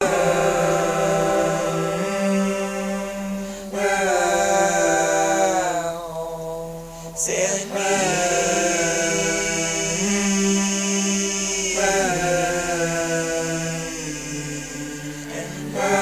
ME3 Vocals
A collection of samples/loops intended for personal and commercial music production. All compositions where written and performed by Chris S. Bacon on Home Sick Recordings. Take things, shake things, make things.
loops, beat, rock, Indie-folk, drums, whistle, bass, synth, acapella, harmony, free, voice, acoustic-guitar, guitar, percussion, vocal-loops, melody, Folk, indie, loop, drum-beat, original-music, looping, sounds, piano, samples